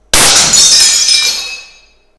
40x40cm WindowGlass PVC 1
Sound of breaking 40x40 cm window glass above PVC floor.
breaking, glass